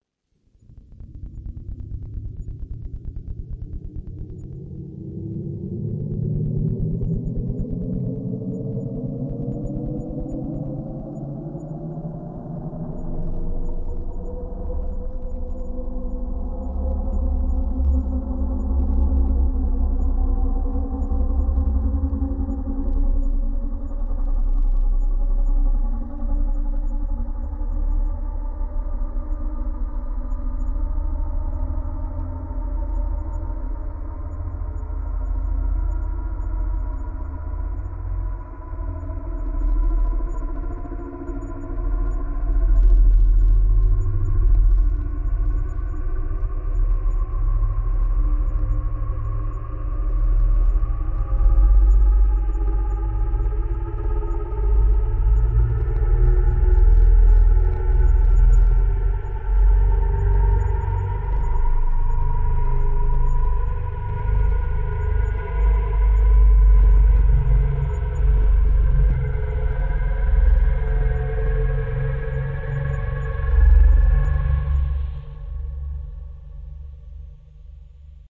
entrando a algún lugar
aliens, extraterrestre, fiction, nave, sci-fi, space, spaceship, ufo